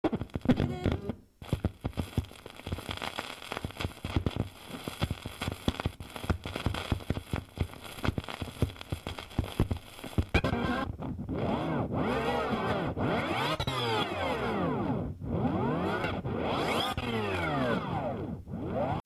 scratch "record player"